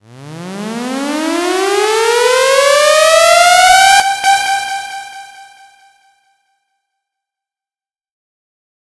Ideal for making house music
Created with audacity and a bunch of plugins